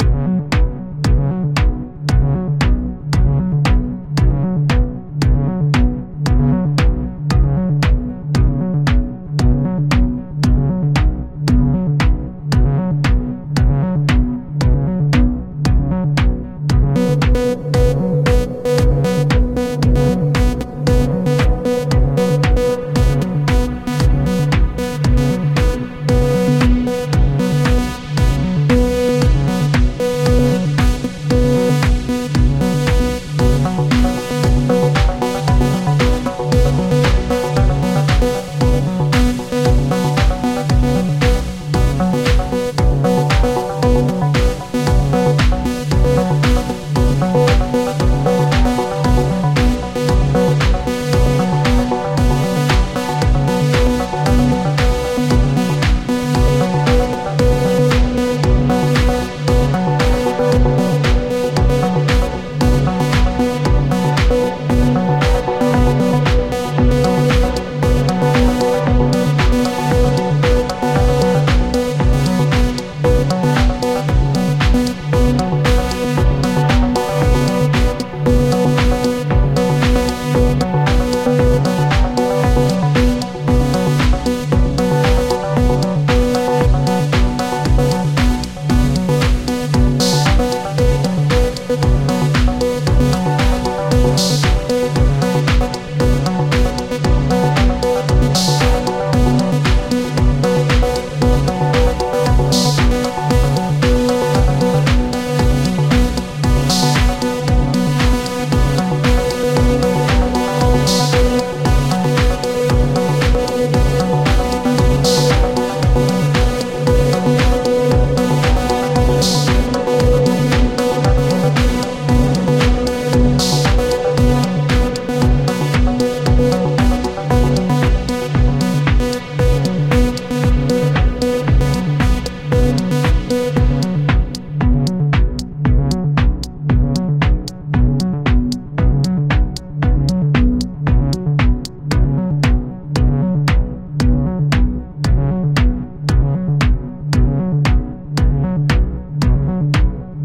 electronic-base-loop-and-powerfull-lead.
Synths: Ableton live,Silenth1,Synth1,Reason.